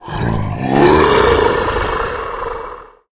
Dragon roar. My vocals, slowed down.